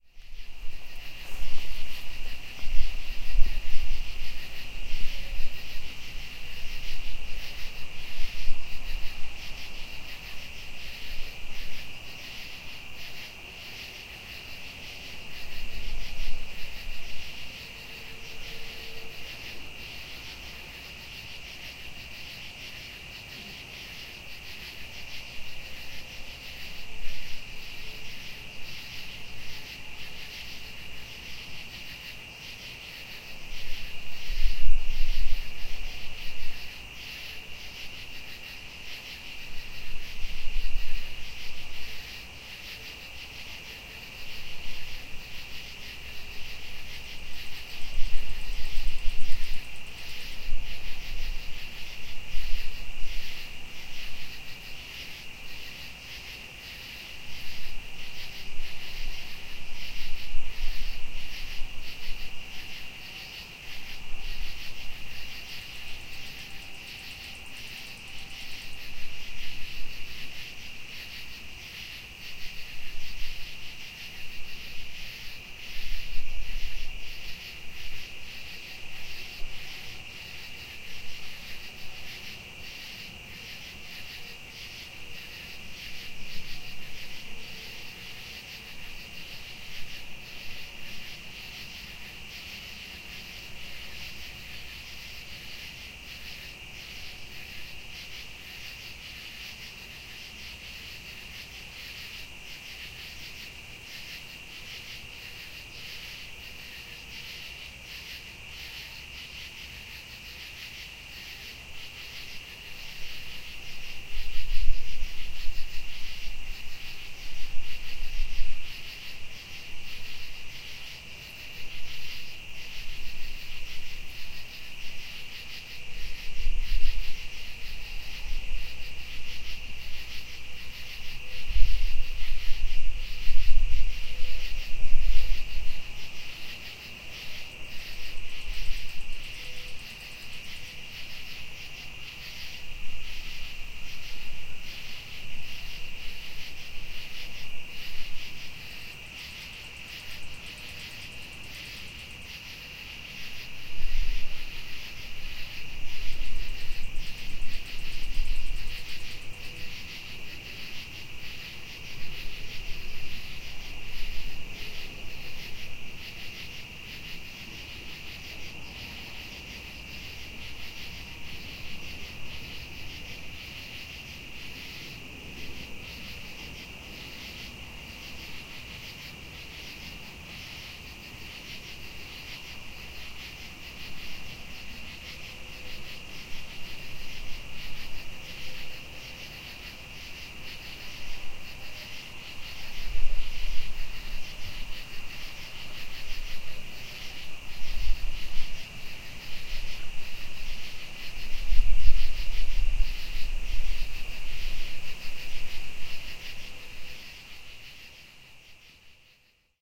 Stereo field recording which puts listener in the middle of rural Indiana mid-summer night, rich with crickets, cicadas, frogs.